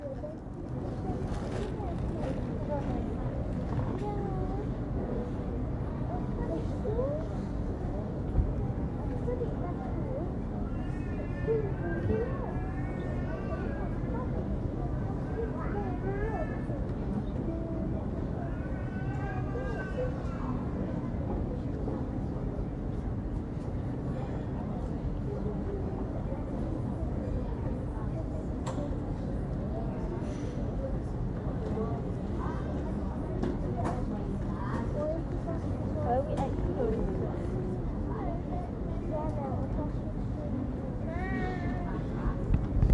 people at airport room 2
Group of people waiting to board a plane at the airport.
Sounds of conversation and large room ambiance.
Recorded with Zoom H1 built in mics.
large-room, people, group, airport, travel, crowd